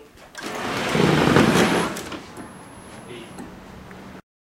Mechanical Openings Heartfelt Wanderings
Representational approaches to field recording are bullshit. On another day i will explain why i feel this to be the case. But it centers around a critique of the distinction between representation/reality, along with a critique of the concept of mediation.
Mechanical Openings, Heartfelt Wanderings was recorded with a Tascam DR100mkii and a Rode shotgun mic.